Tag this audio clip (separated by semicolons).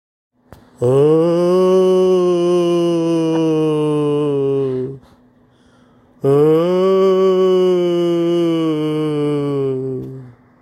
haunted; scary; Ghost